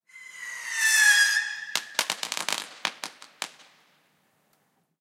Halloween firework captured from circular courtyard, bass roll off applied.